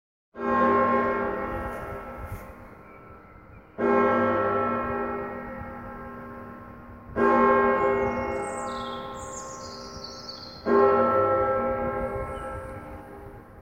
Bells Bong
Recording of a bell tower. Some birdsong in the track.
ringing, dong, ring, chime, tower, clanging